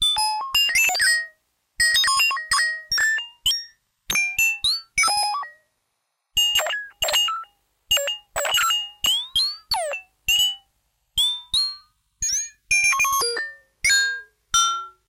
ARP Odyssey chirps and blips
Random series of short high frequency sounds generated by ARP Odyssey. An accidental "R2 detour".
analog, Odyssey, sci-fi, synth